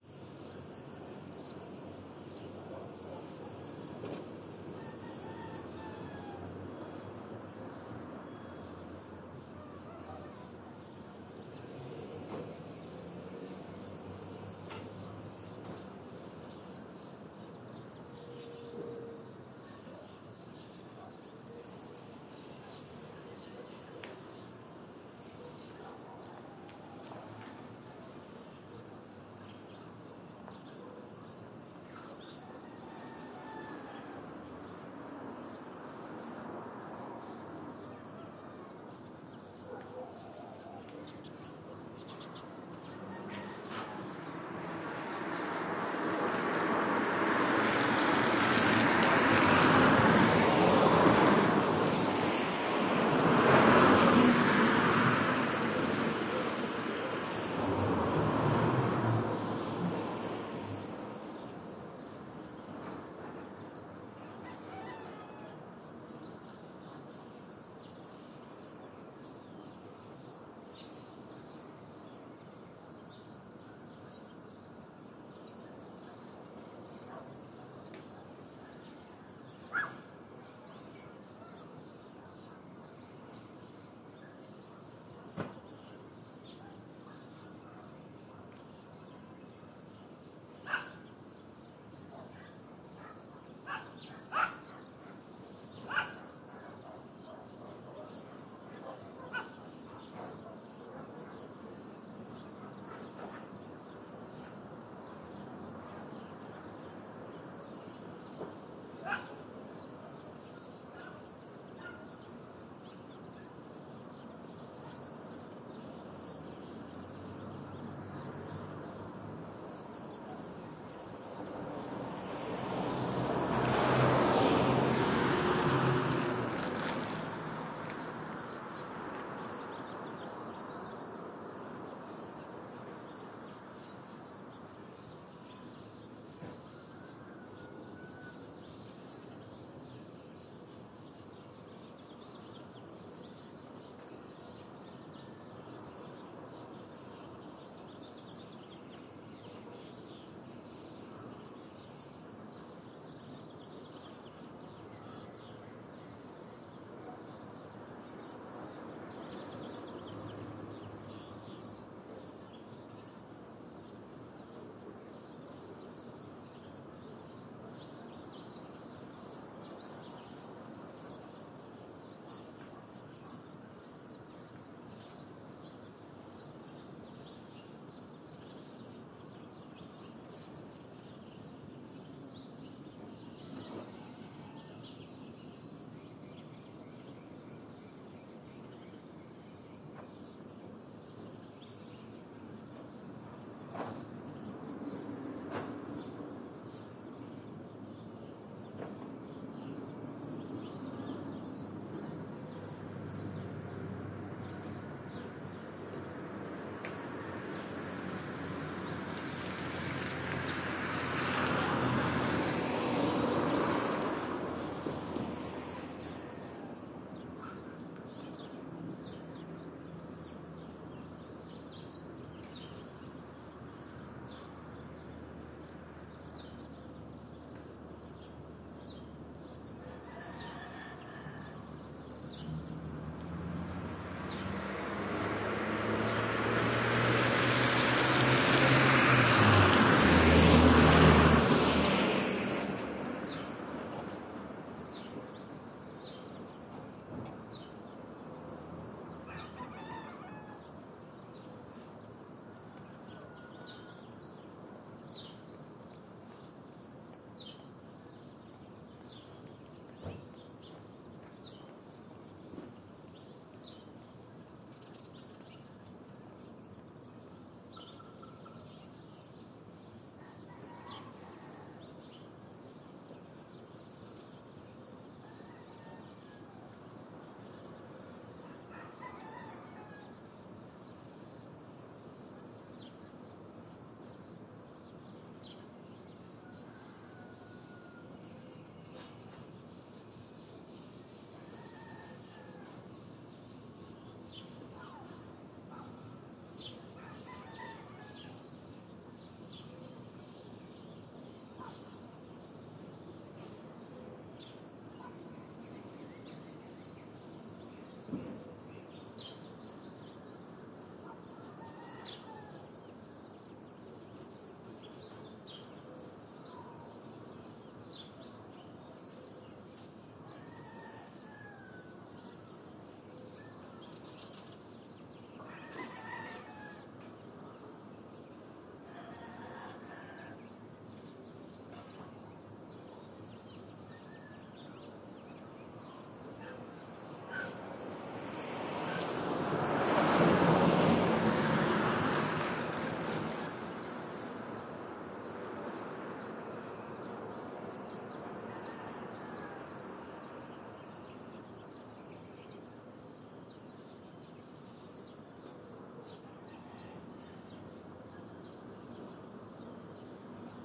AMB S EAST LA MORNING 8
My wife and I just recently moved to East Los Angeles, and the sounds are new and wonderful. Lots of chickens, no more automatic sprinklers, and lots of early riser heading off to work. This is a stereo recording of the early morning in our new neighborhood.
Recorded with: Sound Devices 702T, Beyerdynamic MC 930 mics